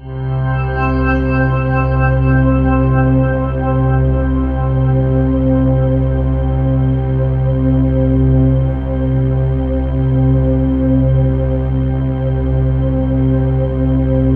Custom pad I created using TAL Sampler.
C2, sample, pad, synthesizer, synth, single-note, loop, ambient, atmosphere, electronic